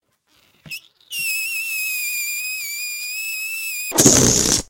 A combination of sounds from this site. Ballon squeak then the air escaping in a hurry. Used for an animation of a balloon flying around the screen then exiting in a hurry.
deflating, balloon, escaping